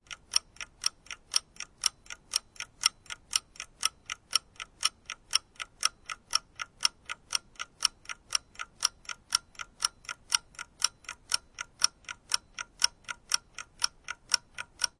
Ticking clock sound.
clock, dr-100, room, tascam, tick-tack, tic-tac